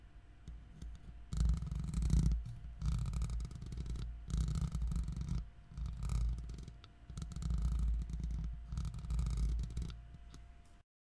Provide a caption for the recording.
Pen on microphone
The sound of a pen tapping on the microphone casing.
tap, pen